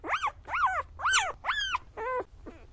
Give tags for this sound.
dog
grunt
puppy

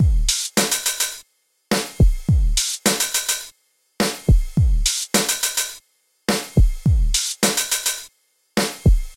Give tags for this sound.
105
beat
bpm
drumloop
loop
Maschine

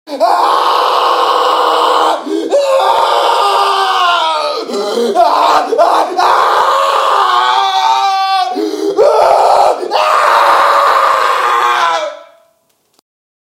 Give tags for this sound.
painfull
murdered
haunted
torment
yell
terror

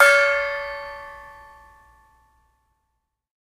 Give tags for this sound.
hit
mini
gong
percussion

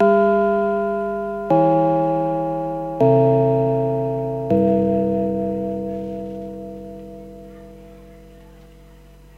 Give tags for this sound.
bell
gong
school

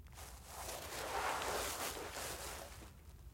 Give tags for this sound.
crunchy
grass
movement
space
spacesuit

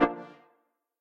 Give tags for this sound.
button
click
game
hi-tech
interface
menu
option
press
select
short
switch

